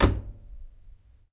The sound of closing the door of my washing machine.